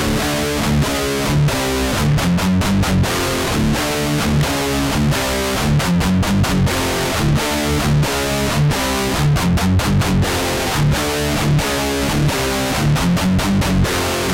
REV GUITAR LOOPS 5 BPM 133.962814
13THFLOORENTERTAINMENT, 2INTHECHEST, DUSTBOWLMETALSHOW, GUITAR-LOOPS, HEAVYMETALTELEVISION